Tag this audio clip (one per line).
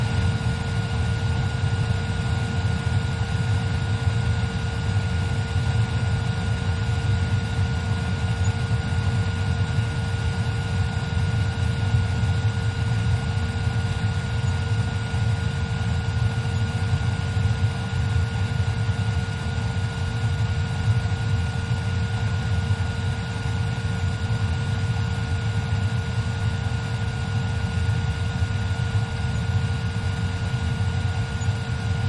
airflow
fan
field-recording
industrial
ventilation